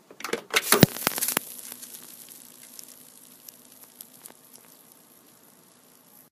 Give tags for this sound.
CRT; TV; analog; cathode; cathode-ray-tube; old; power; ray; retro; television; tube; video; vintage

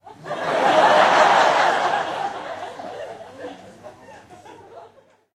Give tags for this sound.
audience; laugh; theatre; czech; crowd

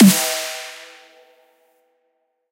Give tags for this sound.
drum; dubstep; snare

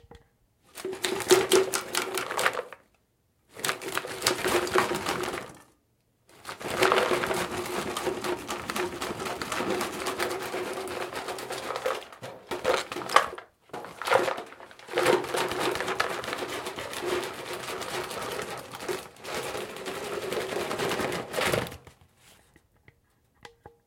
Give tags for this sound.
rumble; rattle; can; garbage; metal; trash; rubbish

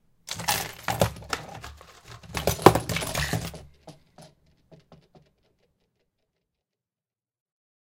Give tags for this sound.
dresser; junk; noise; rattling

